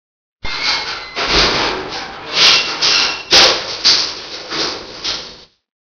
ODwyers Store metal sliding gate closed & locking
A sliding padlocked door to the bottle store (drools) of my local pub which is in the smoking area and reguarly in use so it shuts down conversations its so harsh and loud